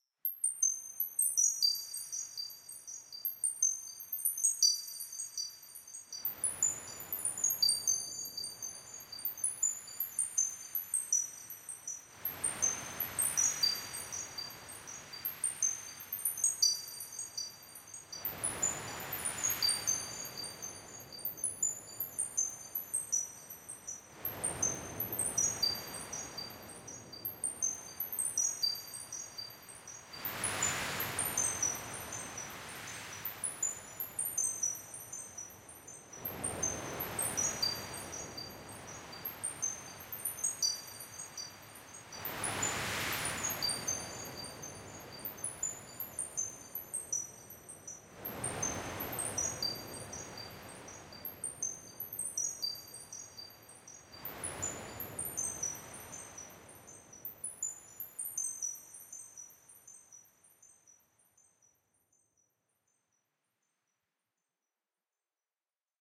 Wind Chimes and Waves
this is 1 sound generated using Sylenth Vst. osc 1 is doing the sea / wave sounds and osc 2 is doing the wind chimes.
was pretty hard to make the chimes sound random but think it sounds pretty good.
this is used in a track called the wind in my hair. still waiting for the vocals :)
beach, chimes, coast, ocean, sand, sea, seaside, shore, water, waves, wind